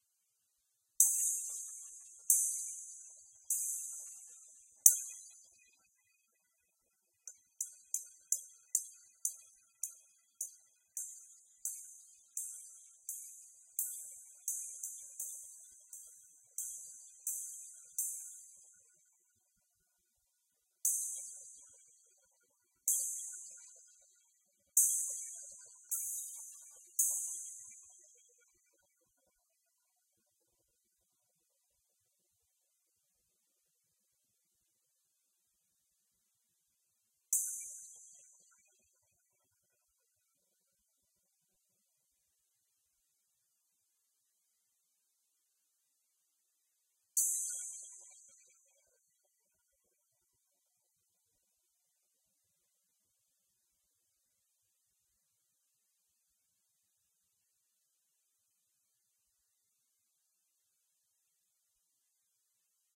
high-hat cadenza
striking top hi-hat independently
cymbal, drone, dry-cymbals, hi-hat, overtones